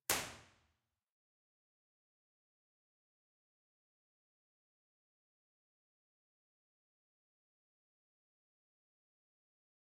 ESMUC Choir Hall IR at Rear Center
Impulse Response recorded at the Choir Hall from ESMUC, Barcelona at the Rear Center source position. This file is part of a collection of IR captured from the same mic placement but with the source at different points of the stage. This allows simulating true stereo panning by placing instruments on the stage by convolution instead of simply level differences.
The recording is in MS Stereo, with a omnidirectional and a figure-of-eight C414 microphones.
The channel number 1 is the Side and the number 2 is the Mid.
To perform the convolution, an LR decomposition is needed:
L = channel 2 + channel 1
R = channel 2 - channel 1
esmuc, impulse-response, ir, reverb